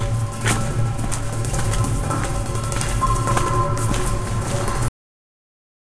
Metal Hand Fence
Metal is dead labor made permanent. Its resonance is the result of many workers' toil and intelligence struggling over a product that will belong to someone else. The urban environs sounds out this secret fact of social life; the real trick is learning how to hear it.
Recorded with a Tascam Dr100mkii.